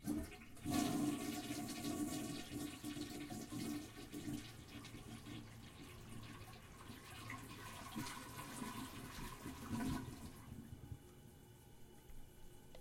TOILET FLUSH 1-2
bathroom, flush, toilet